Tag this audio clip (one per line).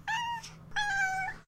Cat
purring
sound